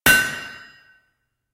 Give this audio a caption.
A stereo recording of a single strike with a steel hammer on a piece of hot steel on a large anvil mounted on a block of wood. Rode NT4 > FEL battery pre amp > Zoom H2 line in.